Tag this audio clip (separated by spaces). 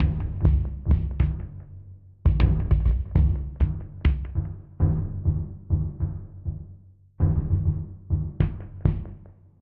Bass-Drum 100bpm MrJimX Drums Alternative-Bass-Drum-Set 4-4 MrJworks works-in-most-major-daws MrJkicKZ groove Loop